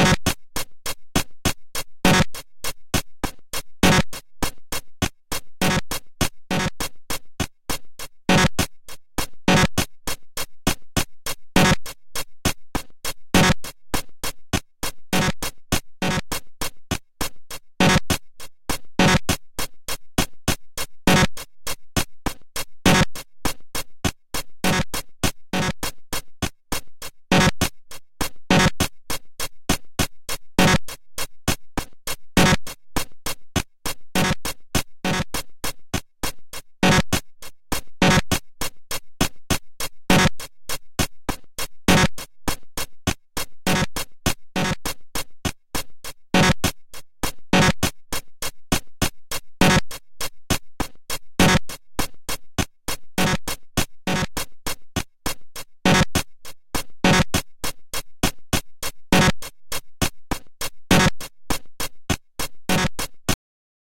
Audio 20Patt 13 100 bpm20
The sound chip of the HR 16 has a LOT of pins. A ribbon cable out to a connection box allows an enormous number of amazing possibilities. These sounds are all coming directly out of the Alesis, with no processing. I made 20 of these using pattern 13, a pattern I'd programmed a long time ago. But I could have made 200.. there's so many permutations.
glitch, Alesis, percussive, circuitbent